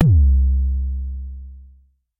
MBASE Kick 05
i recorded this with my edirol FA101.
not normalized
not compressed
just natural jomox sounds.
enjoy !
kick, jomox, bassdrum, analog, bd